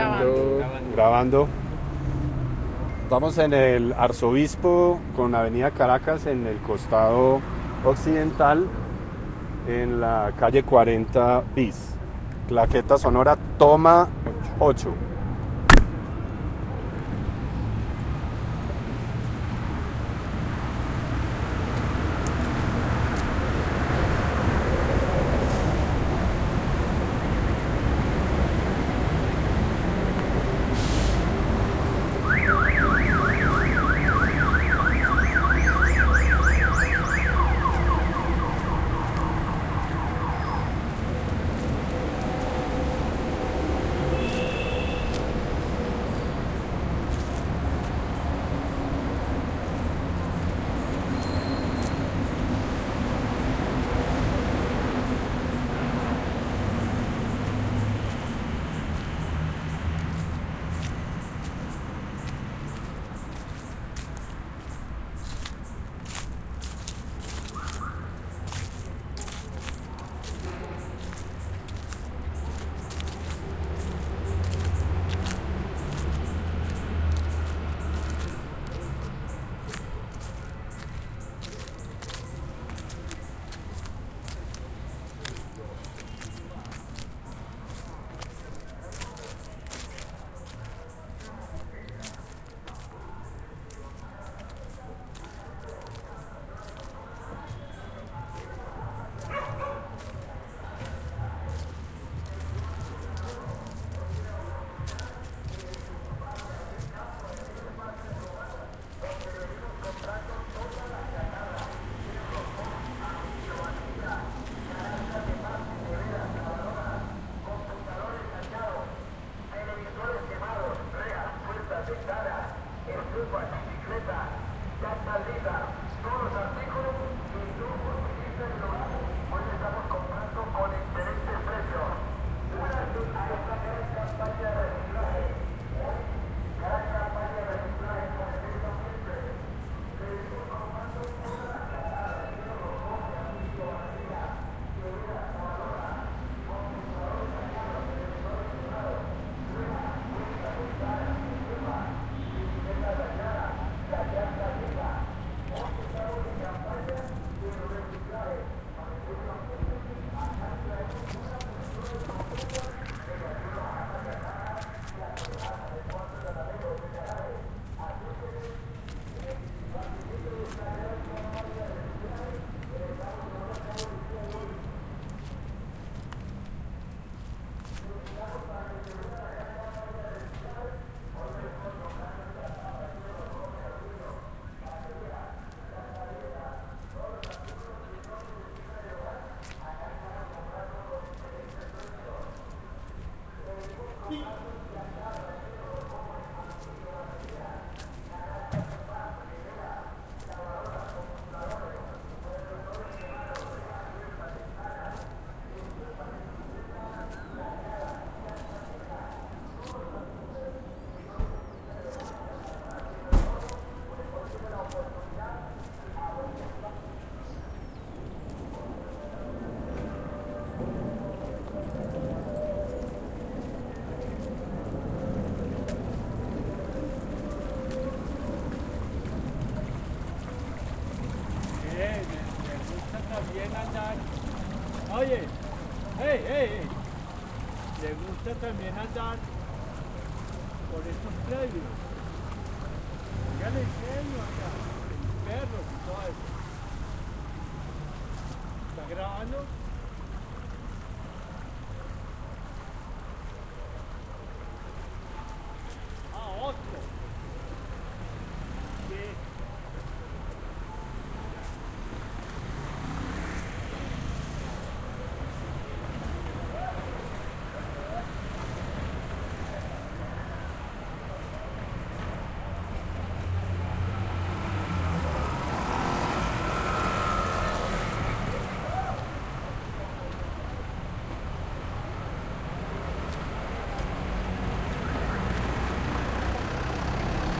Field recording of Bogota city in Chapinero locality, around 39 and 42 street, between 7th end 16th avenue.
This is a part of a research called "Information system about sound art in Colombia"